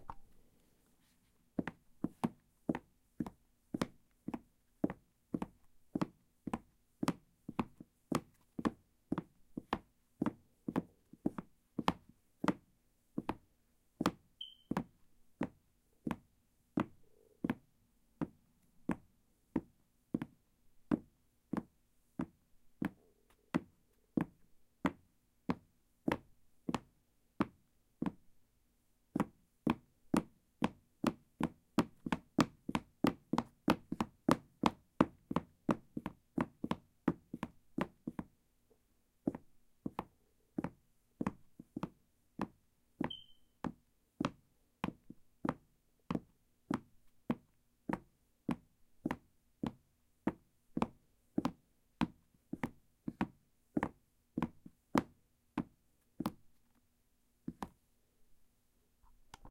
Stepping on wood floor at different speeds. Mostly walking and a slight jog. The soles of the shoes were a hard rubber and dressier in appearance. (Sorry if you hear my fire alarm chirp in the background. I need to change the battery...)